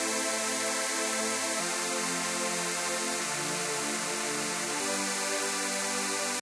Hardstyle loop - Chords 1
Chord loop, 150 bpm !!
Programs used..:
Fl Studio 20.
Sylenth 1, for the lead sound.
Parametric eq 2, for eq.
FL reeverb2, for reeverb and a small delay/echo.
it this thing on ?